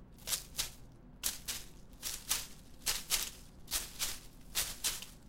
Steps on grass.
ground, foley, grass